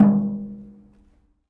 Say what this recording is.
Kicking a wheelbarrow firmly
Kicking a wheelbarrow creating several resonances, inharmonics.
hard, inharmonics, metal, kick, firmly, percussive, hit, wheelbarrow